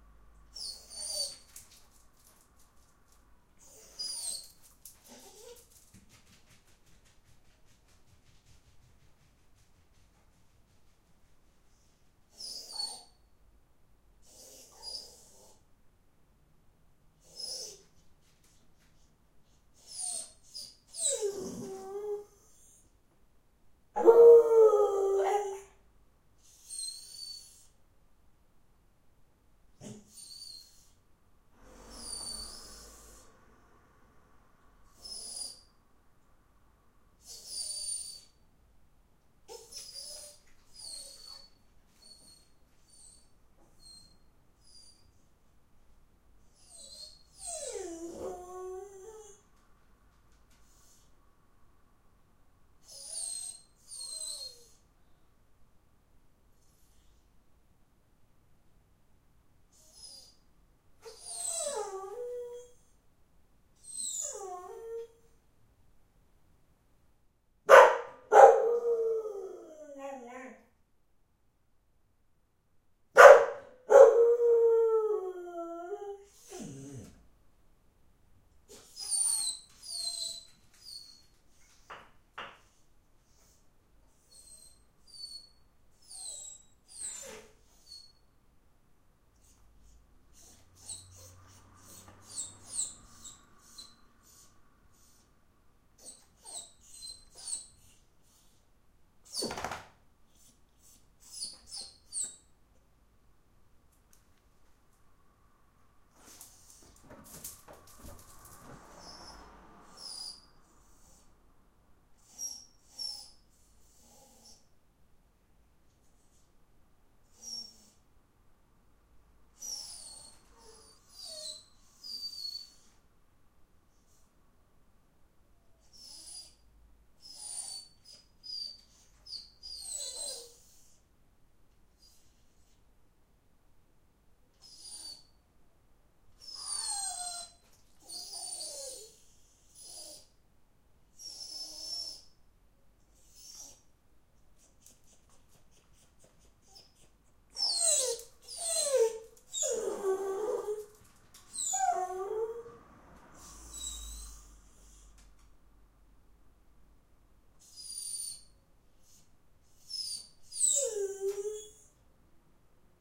dog max whine howl bark
my dog in the hallway, recorded while I'm away. He's got separation anxiety, so sadly enough he vocalises his emotions. This recording is part of the process of understanding him and finally hopefully help him dealing with being alone sometimes.
recorded with a tascam DR100